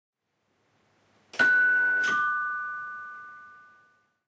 Door Bell Rings
Door bell ringing once. Recorded with CanonLegria camcorder.